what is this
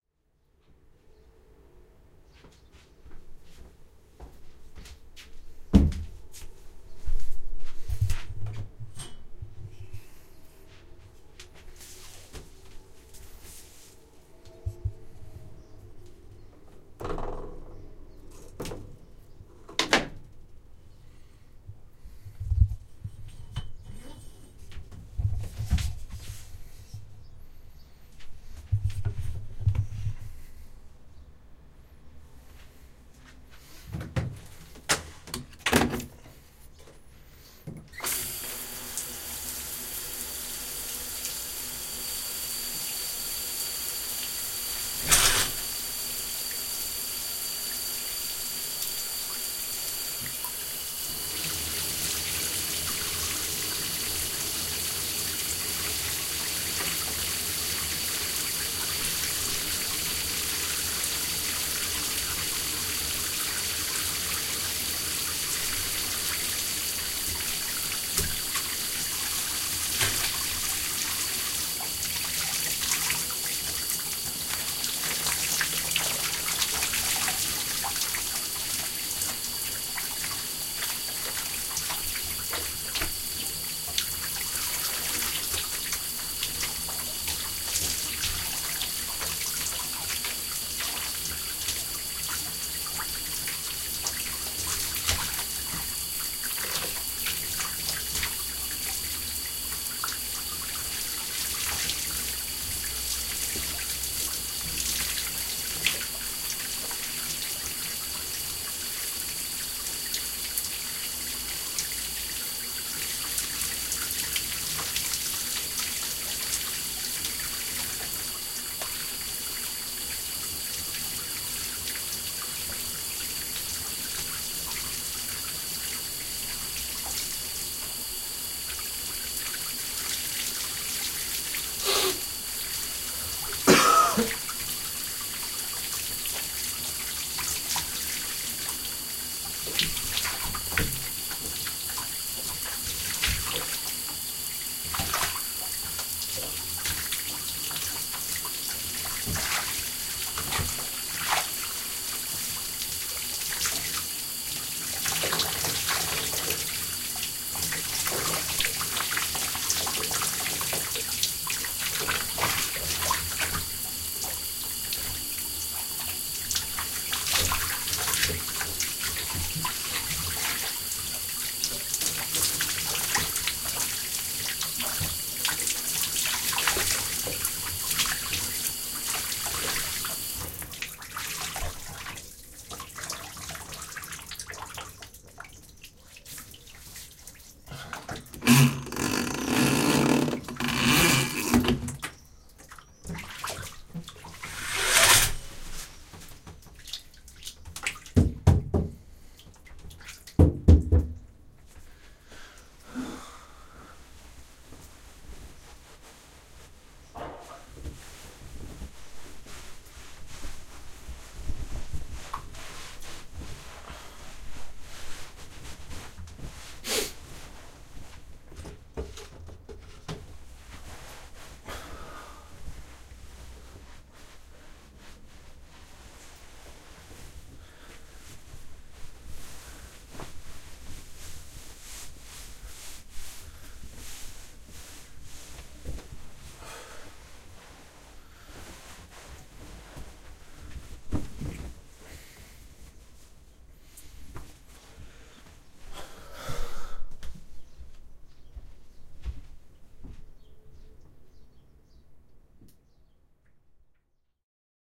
a full shower with nice quality
shower, water